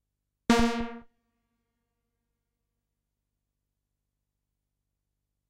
seq-sawbass mfb synth - Velo127 - 058 - a#2
A sawbass sound recorded from the mfb synth. Very useful for stepsequencing but not only. Velocity is 127.